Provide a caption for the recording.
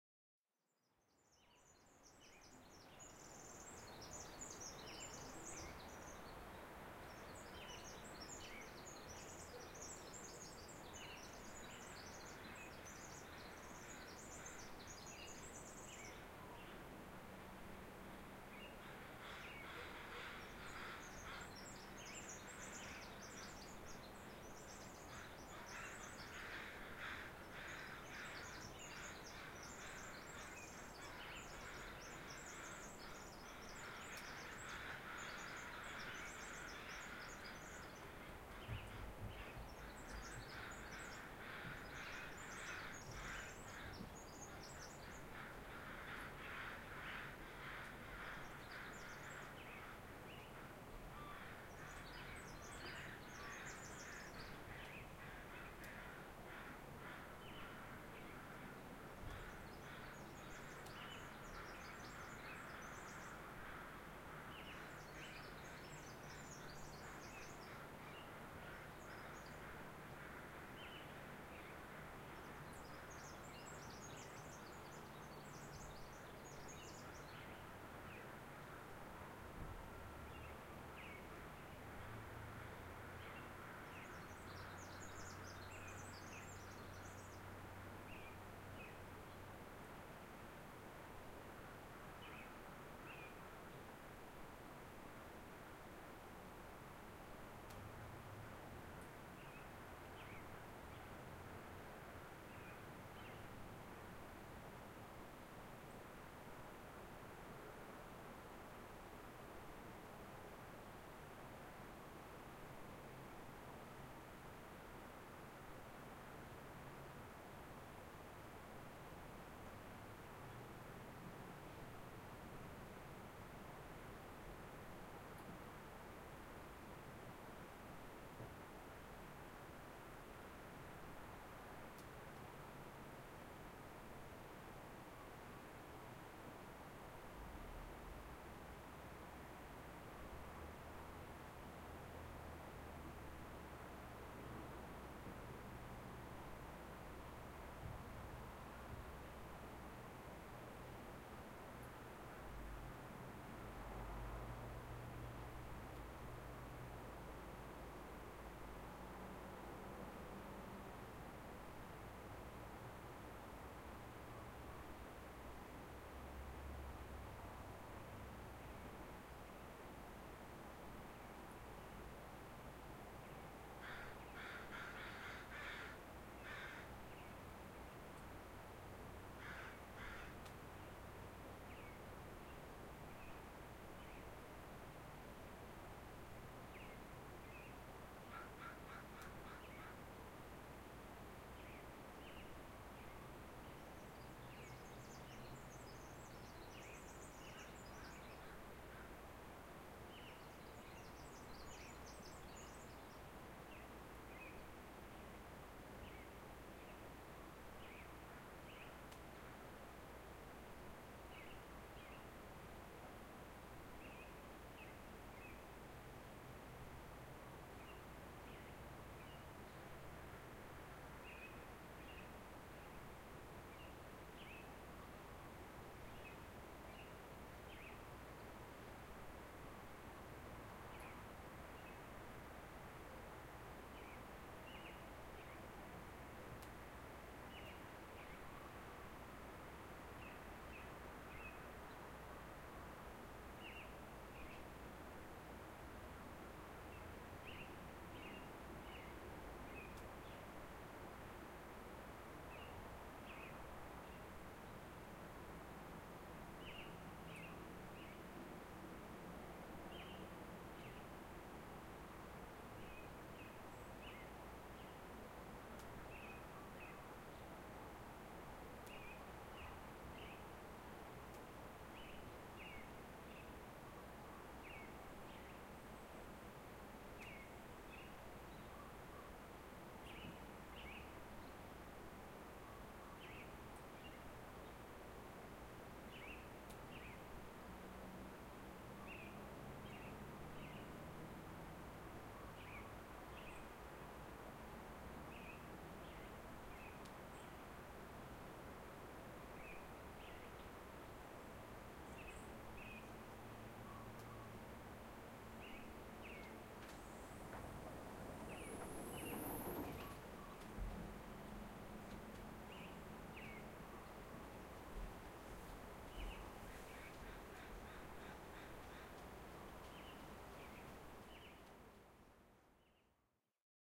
Songbirds and Crows
field-recording, crows